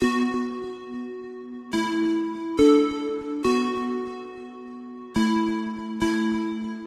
Very simple synth melody loop. Add delay, reverb and some sort of movement on it like with Gross Beat.